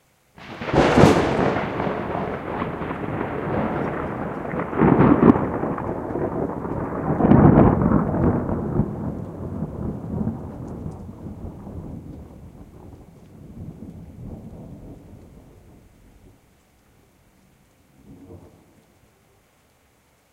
A thunderstorm hit Pécel just after midnight from 19th of July to 20th of July 2012. It produced spectacular vivid lightning. I took also photoes. This is the loudest thunder extracted from the recording.
close, cricket, field-recording, lightning, storm, strike, thunder, thunderstorm, weather